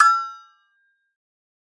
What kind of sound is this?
Tweaked percussion and cymbal sounds combined with synths and effects.